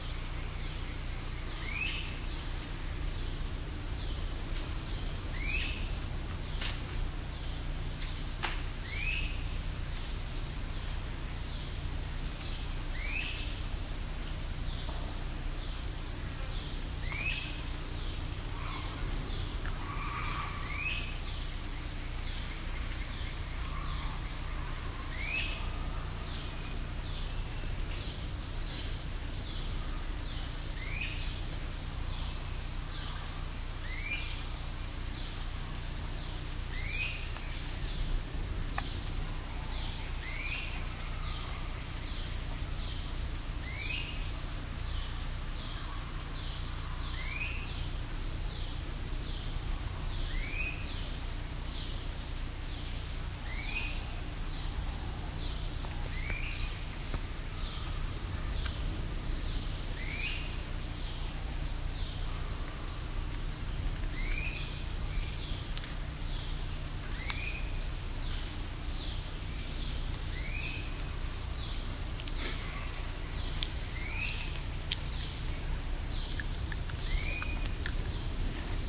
Birds and bugs in the woods of Costa Rica
Birds and insects and other sounds recorded in the Osa Peninsula of Costa Rica. Daytime. December 2015. Recorded with an iPhone.